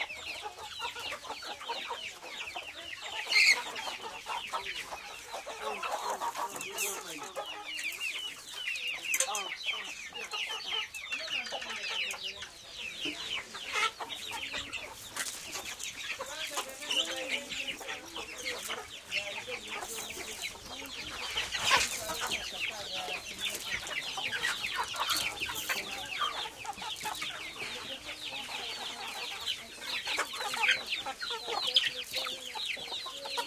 hen house lots of chicks +village voices bg Putti, Uganda MS
chicks, hen, house, lots